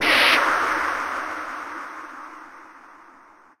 EL MAD CAT